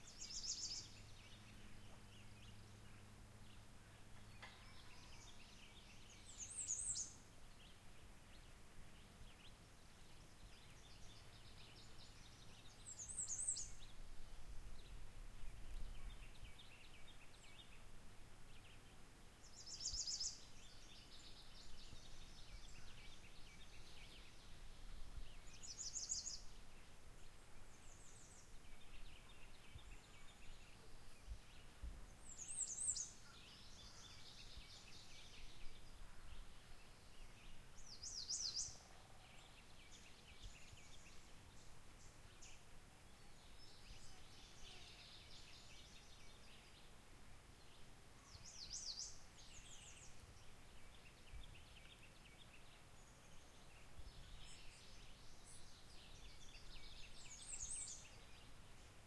Just a simple recording of a nice quiet spring forest. Loops seamlessly.